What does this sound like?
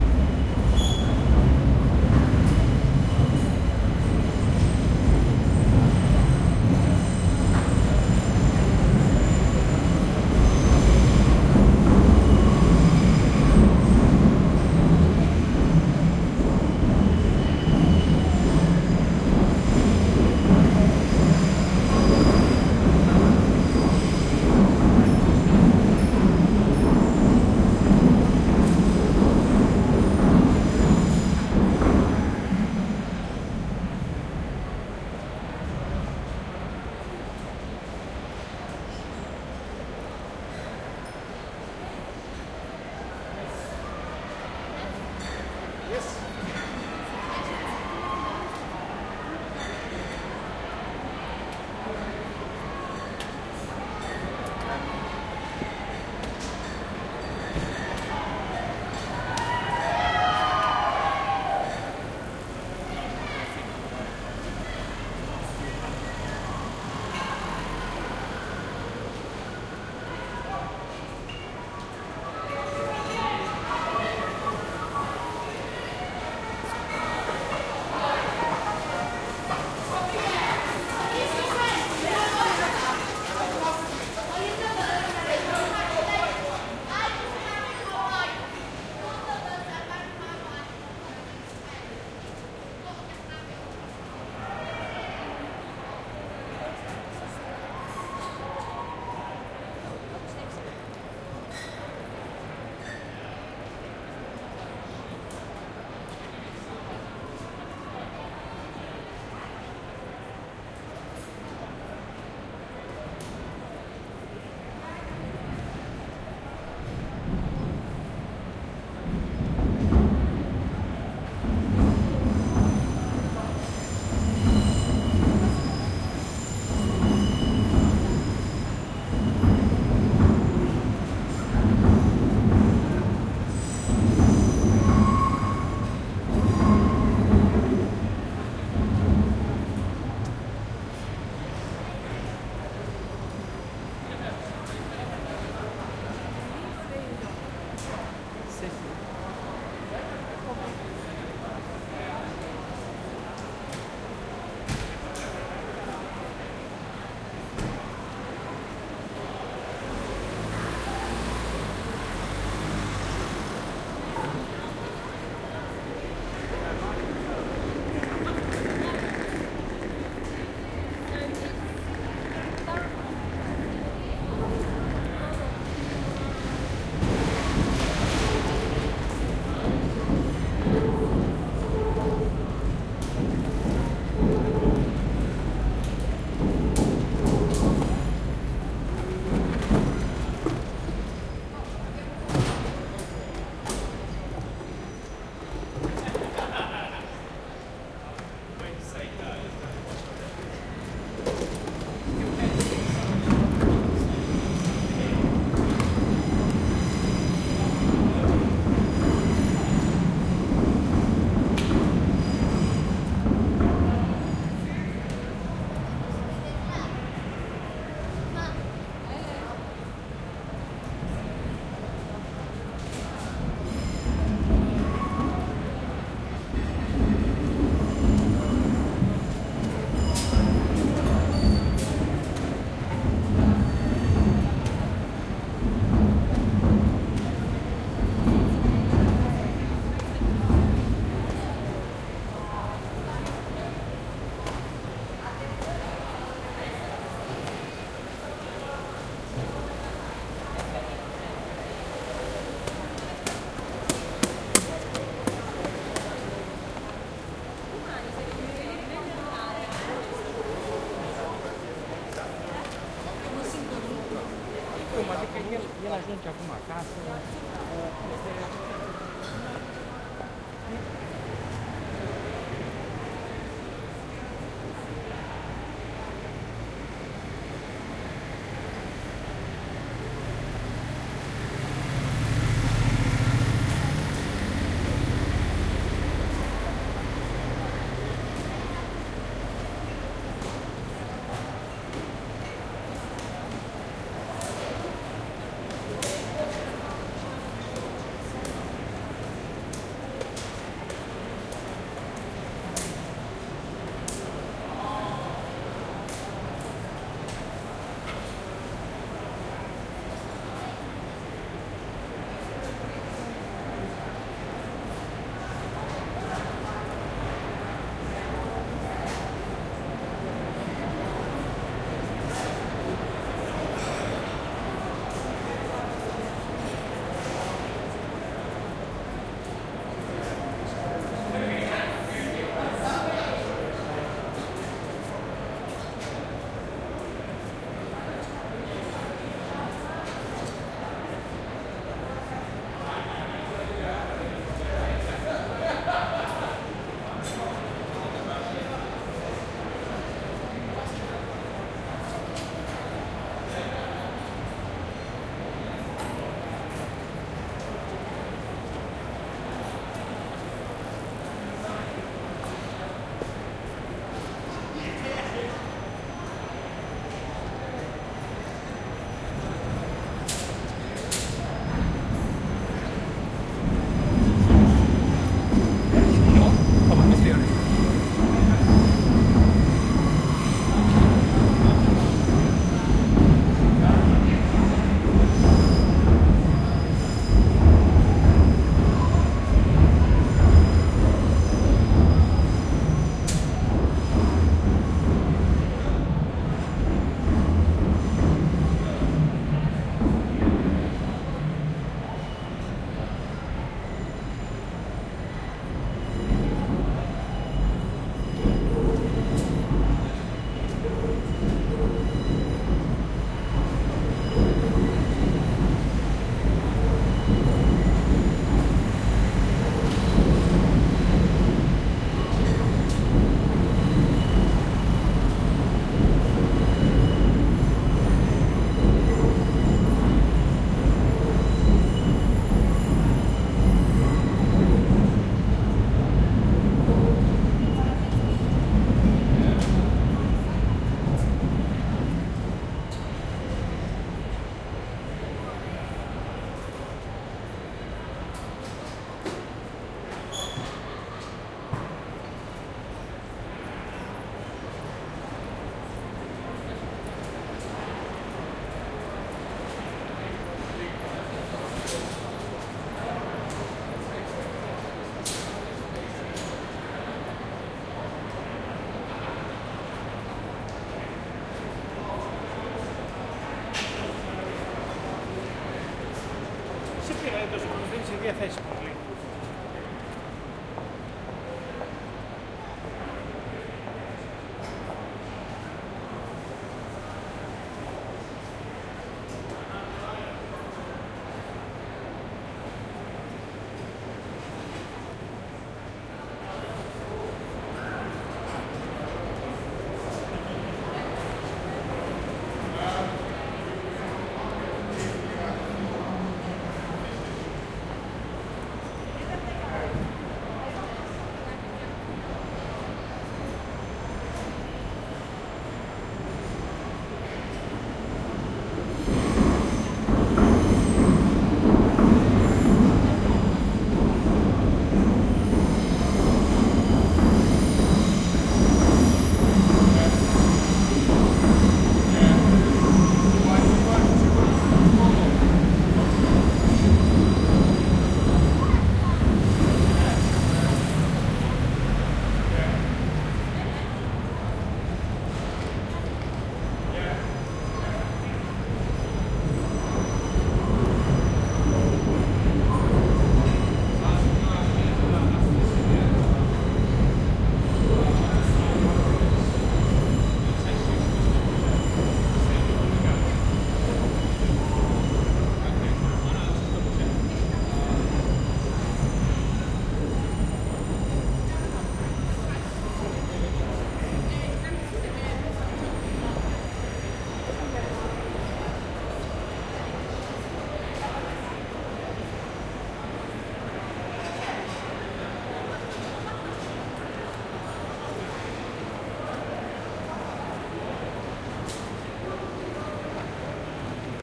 This one was very close to the Shard, in London, in the St.Thomas street. Surrounded by restaurants, a railway (you can hear the overground very well). It was a busy friday afternoon/evening. Enjoy the sounds!